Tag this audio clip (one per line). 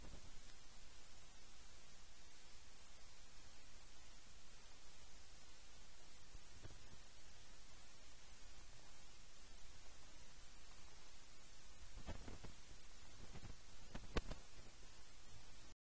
field-recording
school
sfx